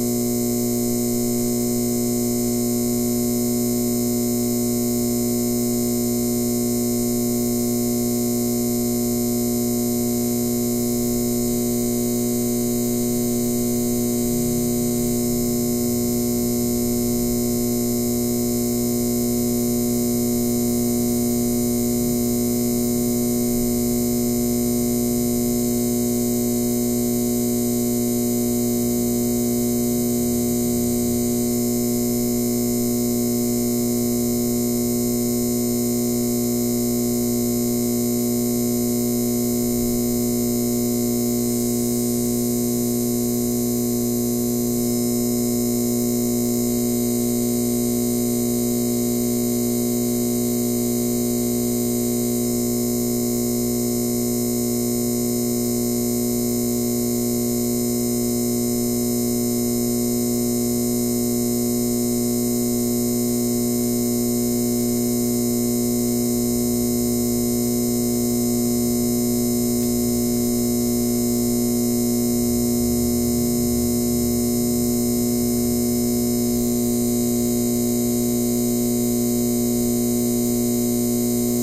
neon tube fluorescent light hum cu2 wider
fluorescent, light